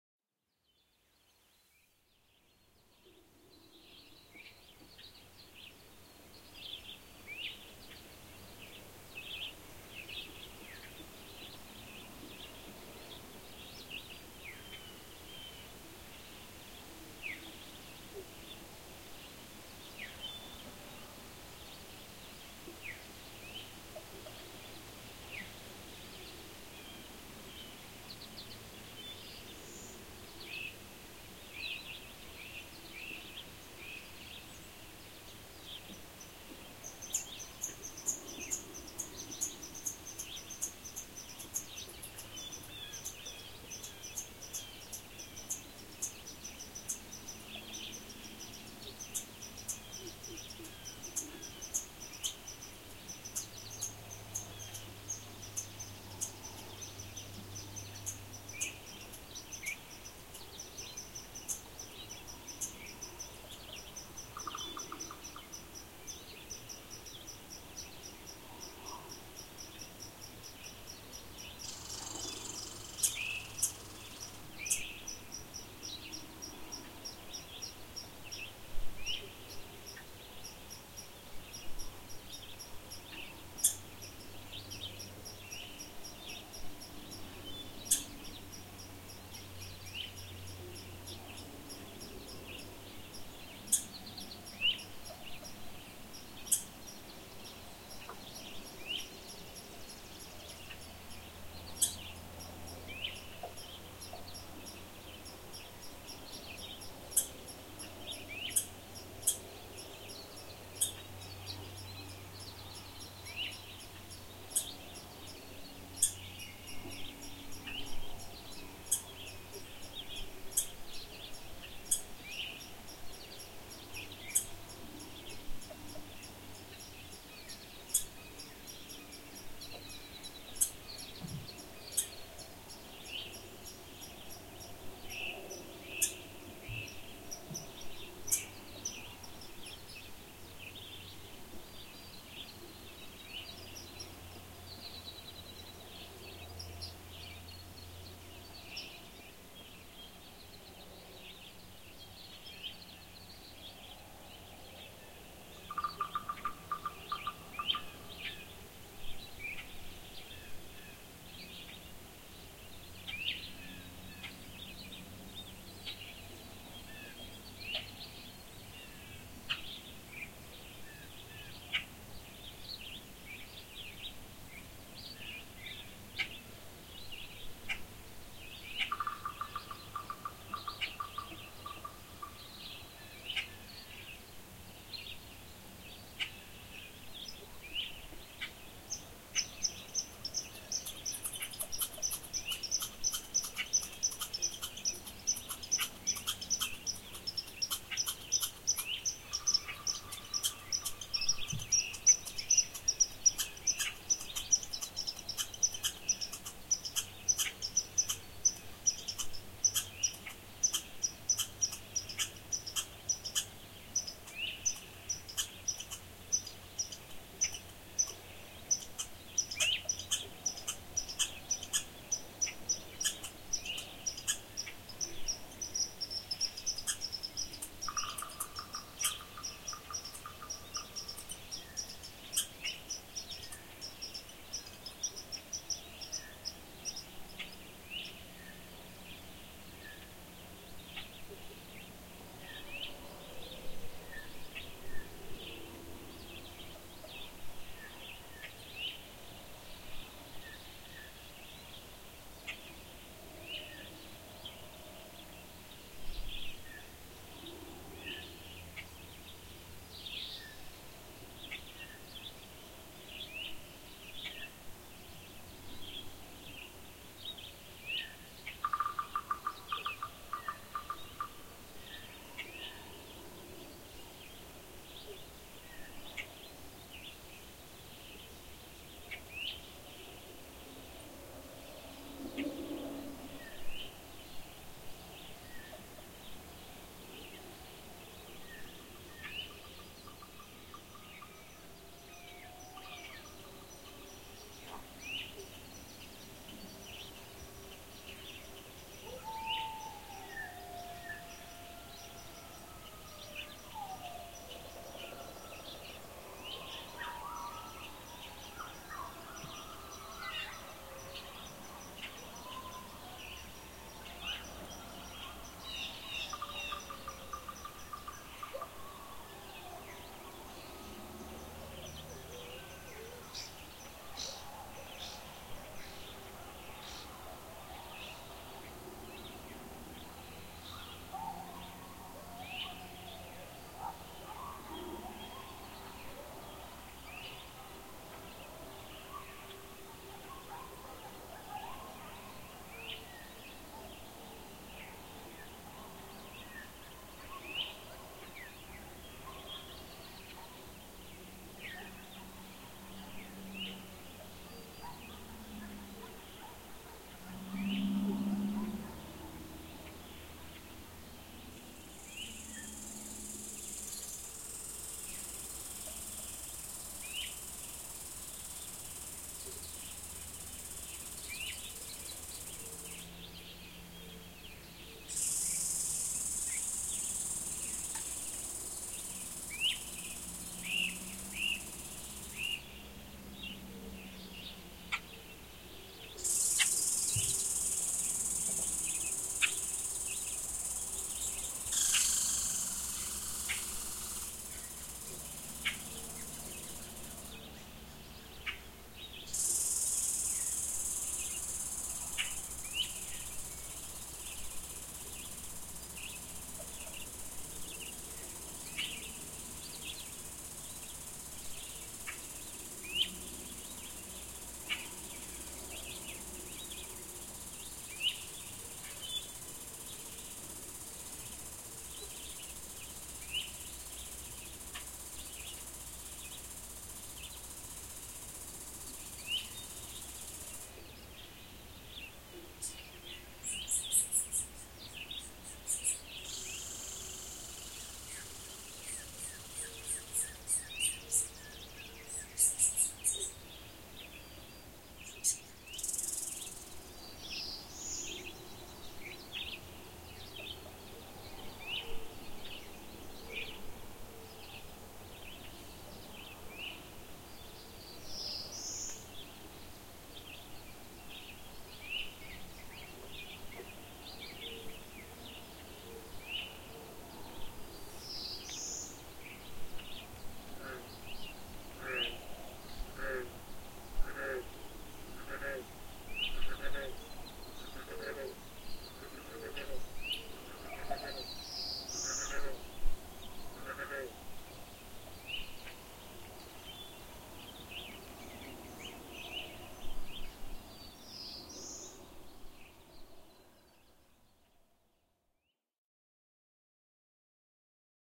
Canadian Forest Ambiance
Field recording in a forest in Canada
Recorded by my friend Martin Marier
Zoom H4N Pro + Accusonus Noise Remover